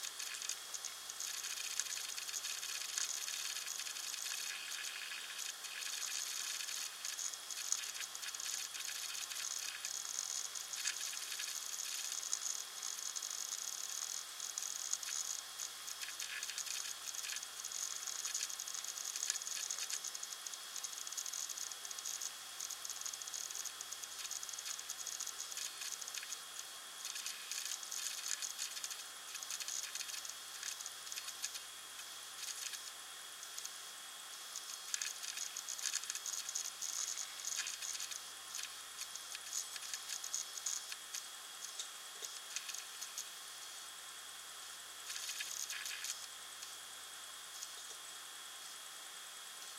bm Hard Drive
Sound of computer hard drive accessing data.